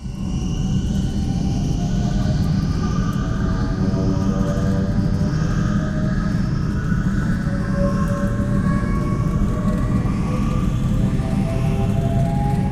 Sound I created while working on a Post Production project of a plane falling.The plain engine sound is mostly a riser and atmos of a construction site.The rattle is a car driving on gravel.